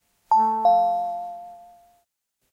A message alert tone for a computer or cell phone.